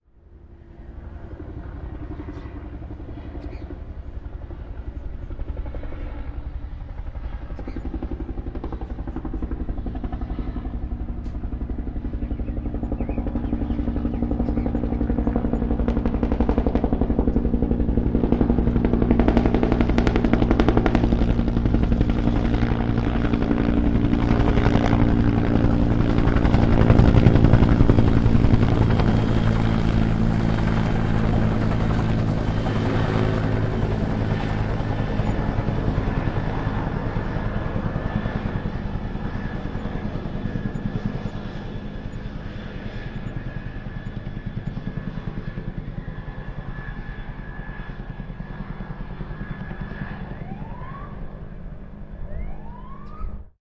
A helicopter that was circling above my house